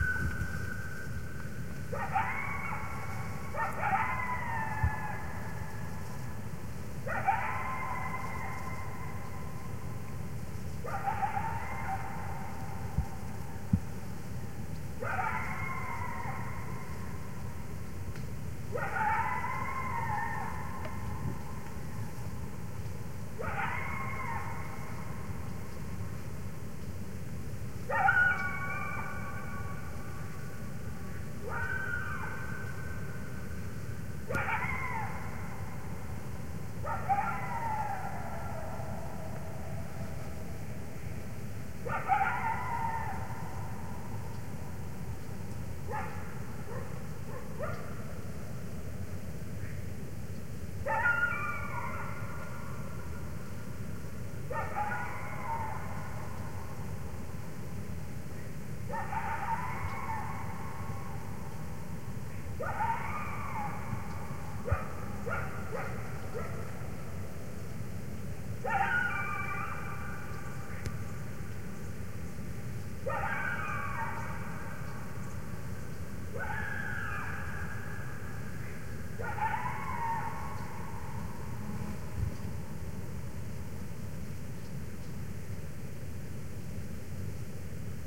Early morning coyote sound
august, lone, coyote, 2016, yosemite